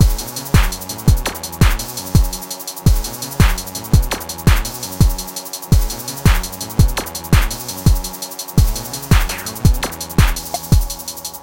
Tattle: Vintage Synth Industrial Loop
industrial, rave, 80s, tape, video-games, 90s, drum-loop